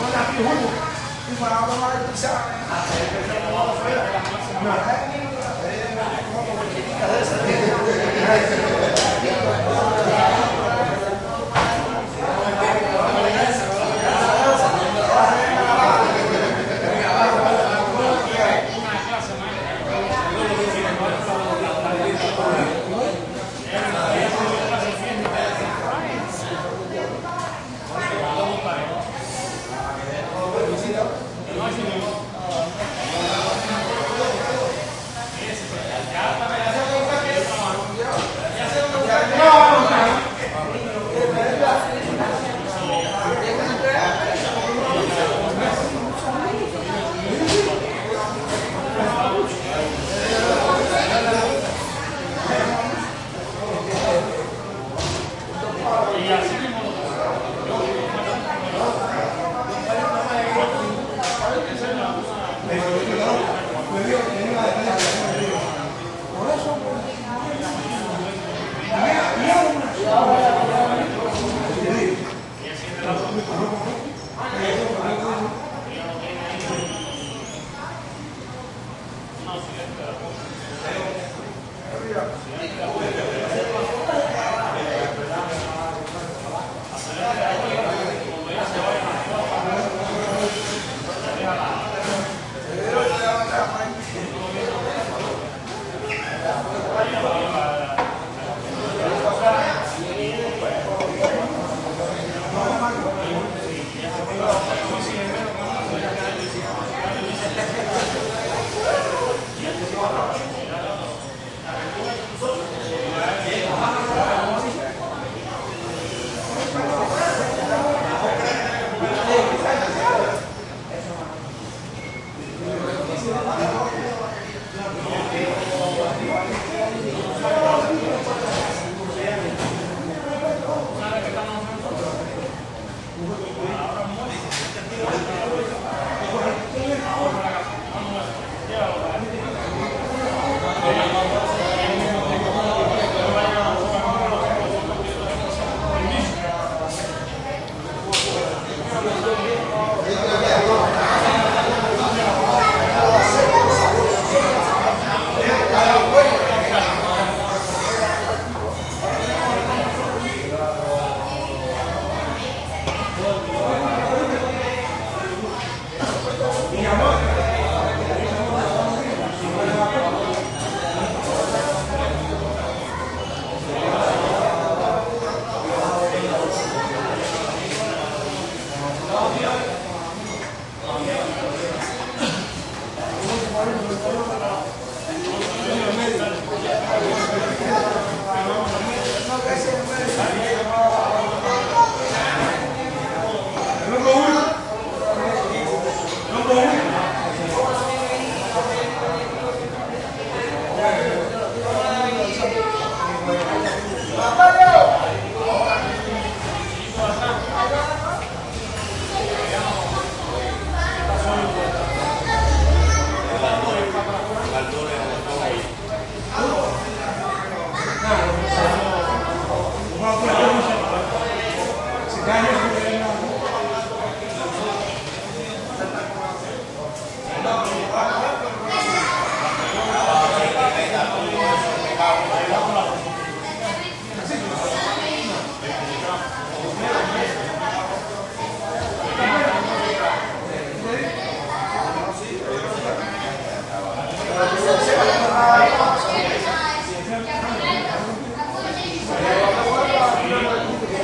crowd intcuban fast food rest
little spanish walla with some roomy echo. dollar pizzas at this place.
fast, int, restaurant